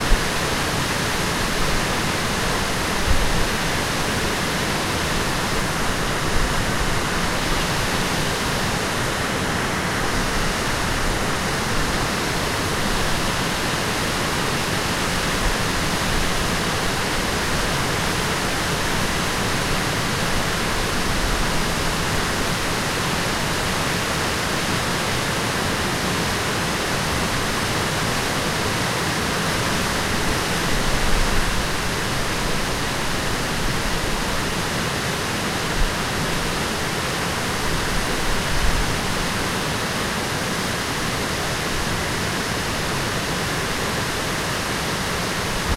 Loud River 001
A very loud and aggressive river flowing through the Scottish countryside.